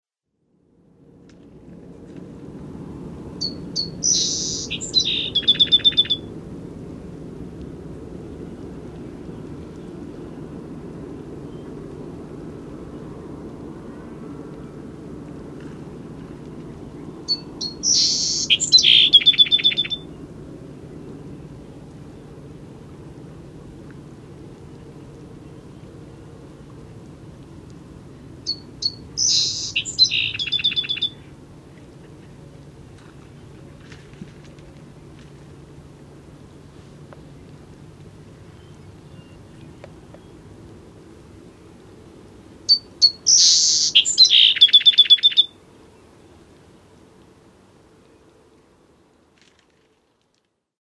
Sherman Sparrow08aug2005
melospiza-melodia, song-sparrow